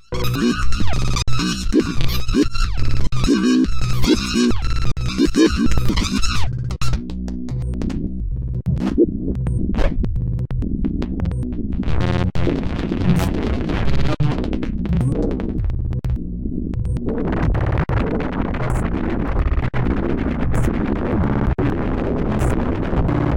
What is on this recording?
Abstract Glitch Effects 006
Abstract Glitch Effects
Electric, Design, Random, Weird, Sound-Design, Sound, Effects, Sci-fi, Glitch, Abstract